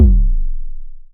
Just some hand-made analog modular kick drums
Synth, Analog, Recording, Modular, Kick